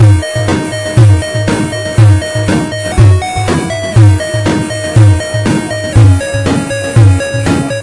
zagi2-chiptune-loop ft reverend-black loop
A remix of two loops I found here. One is called "Chiptune loop" by zagi2 and the other is "Loop 1A" from reverend-black.
Arcade, Loop, Remix, Retro